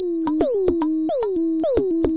110 bpm FM Rhythm -11
A rhythmic loop created with an ensemble from the Reaktor
User Library. This loop has a nice electro feel and the typical higher
frequency bell like content of frequency modulation. A more minimal an
softer FM loop. The tempo is 110 bpm and it lasts 1 measure 4/4. Mastered within Cubase SX and Wavelab using several plugins.
loop, rhythmic, electronic, 110-bpm, fm